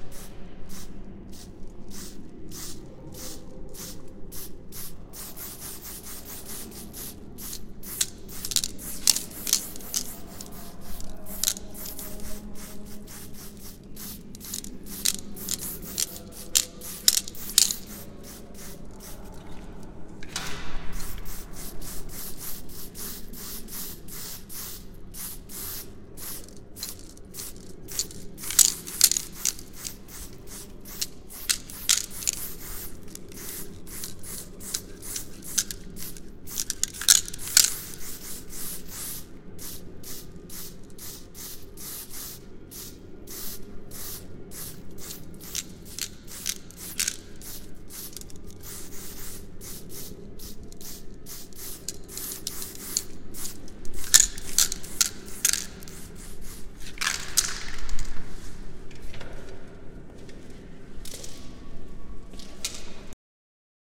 Mono recording of a German graffiti artist painting a picture. Recorded with a Sennheiser ME 64 and Fostex FR-2 in February 2007 in a gallery space in Wuppertal/Germany.